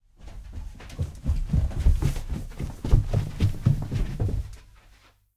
Running down carpeted stairs
Recorded on a Rhode NT2-A, placed at middle of stairs. Running down
carpet, house, run, stairs